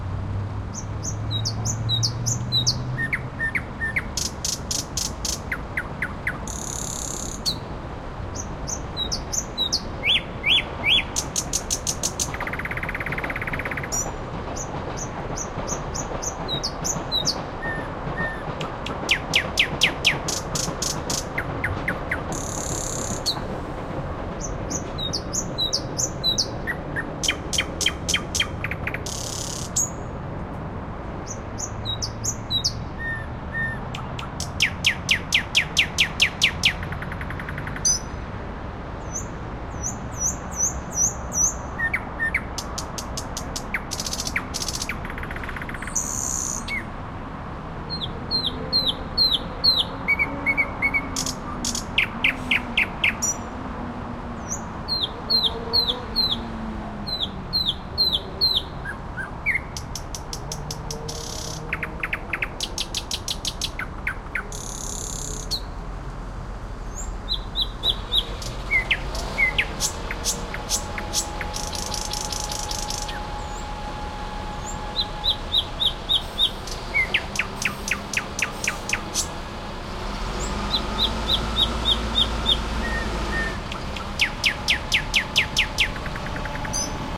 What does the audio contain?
Night Bird Moscow in May_background Skytrain and the road
Bird, City, Moscow, Night, Russia